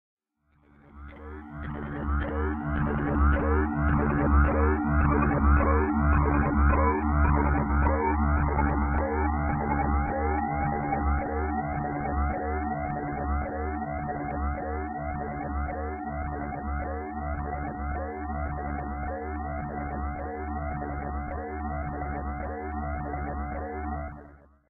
mad robot, ecstatic insects and toads
FX, drone, industrial, soundscape